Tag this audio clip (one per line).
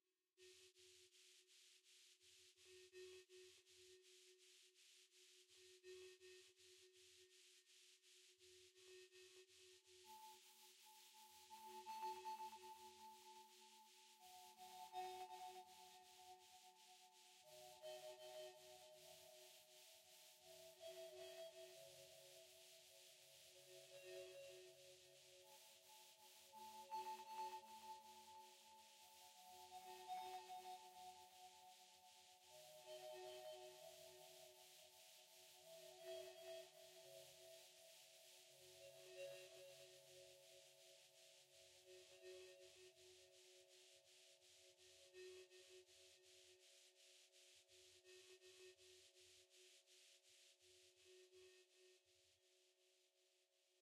ambient
bells
delay
reaktor